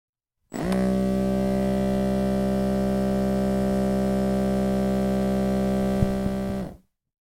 Sonido: 24
Etiquetas: Bomba Audio UNAD
Descripción: Captura sonido de bomba
Canales: 1
Bit D.: 16 Bits
Duración: 00:00:07